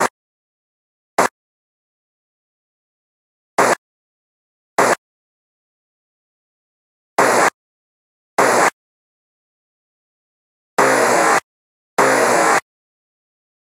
This is meant to be radio. And no, the channels aren’t real. I made the sounds between skips too.
90s, noise, radio, static, TV, vintage